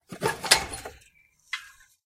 Backyard gate open
Opening a gate leading to the backyard. Recorded using a Sony IC recorder and cleaned up in FL Studio's Edison sound editor.